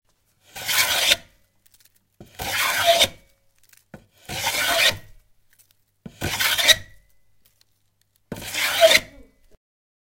A jointer plane used on a piece of pine.
Recorded with apogee one internal microphone.
Carpentry, Plane, woodworking